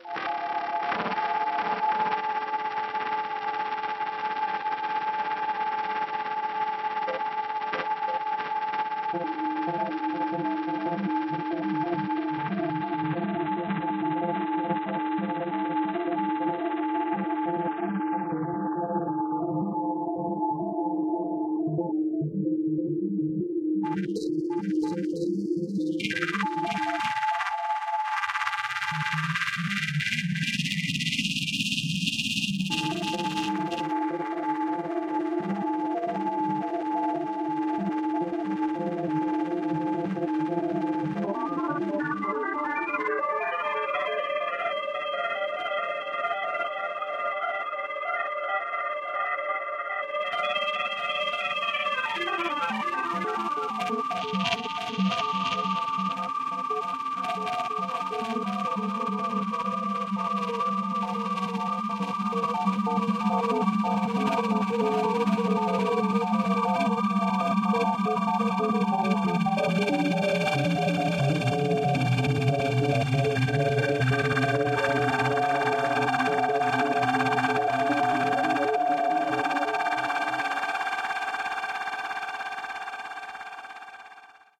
Feedbacking System Sqosc+pitch 16-Jul-2010 7
These sounds have been created in Ableton Live by a 'noise generating' VST which generates noise when fed any audio (or indeed, silence).
The audio signal then feedsback on itself. Sometimes some sort of filter was placed in the feedback loop and used to do filter sweeps.
I control some of the parameters in real-time to produce these sounds.
The results are to a great extent unpredictable, and sometimes you can tell I am fiddling with the parameters, trying to avoid a runaway feedback effect or the production of obnoxious sounds.
Sometimes I have to cut the volume or stop the feedback loop altogether.
On something like this always place a limiter on the master channel... unless you want to blow your speakers (and your ears) !
These sounds were created in Jul 2010.